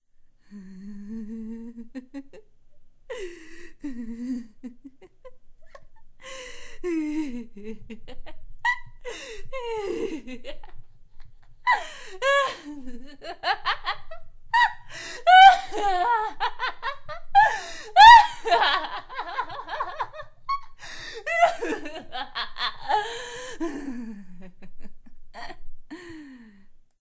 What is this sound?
Female Creepy Goofy Kira Laugh
Me laughing crazily, heavily inspired by Kira's laugh from Death Note. If you want, you can place a link into the comments of the work using the sound. Thank you.